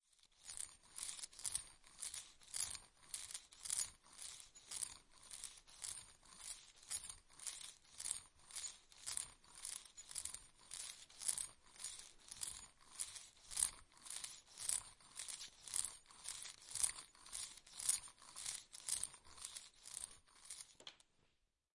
Digital fitness machine
Sound for scifi movie.
CZ, Panska